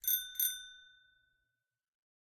Bicicle Ring 1 2
Alarm, Warning